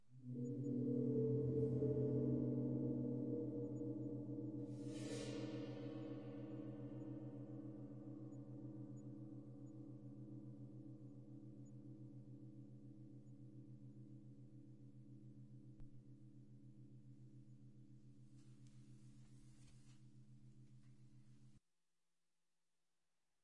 ghost gong 2
cool effect made by dragging a rubber tipped mallet across the gong, instead of striking it. Creates a soft eerie atmosphere.
gear: 2X AKG 451EB,2X RDL STM-2, Casio DA-1 DAT